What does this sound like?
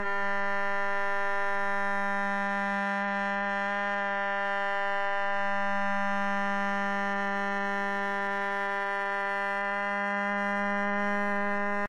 Canada Goose Expanded 2
A time expanded goose, sounds a little like a stringed instrument or some type of horn - weird!